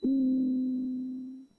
Old Sci-Fi Alert
Old sci-fi sounding alert sound. Quality could be better. (old-analog gear!)
bend, bending, bent, circuit, circuitry, glitch, idm, noise, sleep-drone, squeaky, strange, tweak